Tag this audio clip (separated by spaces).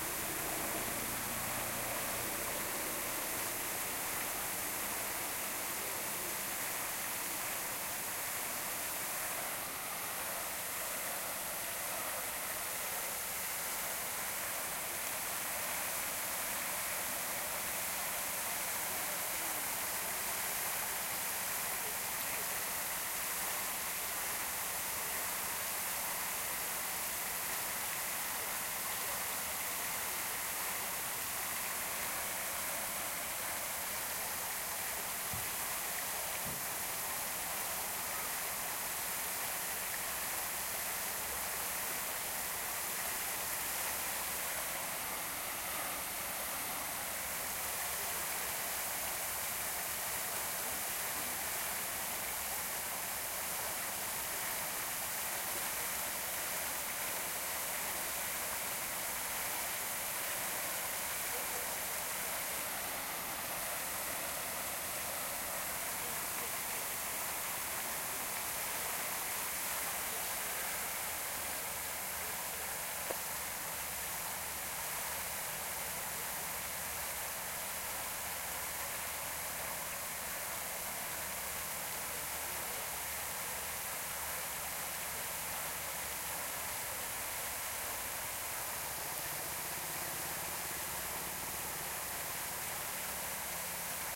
field-recording fountain nature river splashing water